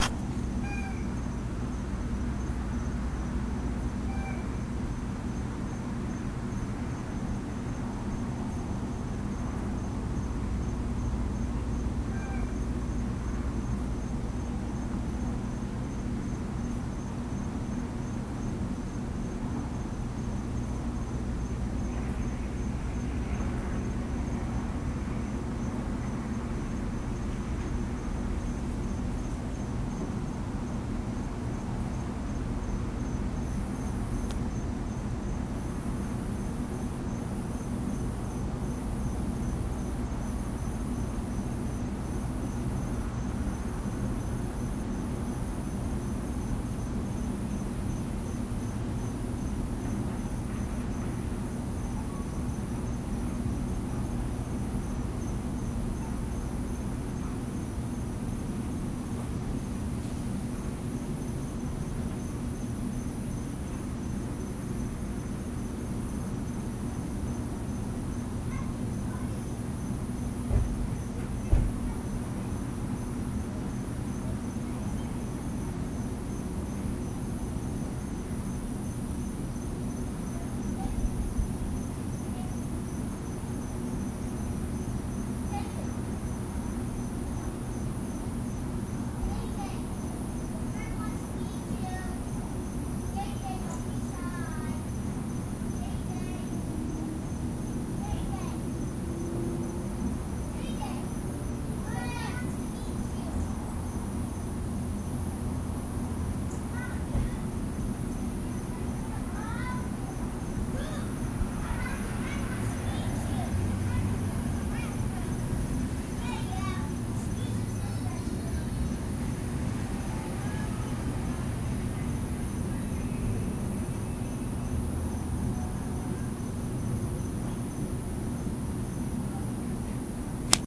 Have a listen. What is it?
raw weirdbirdnoise
Raw unedited recording of weird bird recorded with DS-40.